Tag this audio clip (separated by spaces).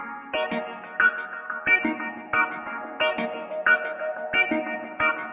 rasta Reggae Roots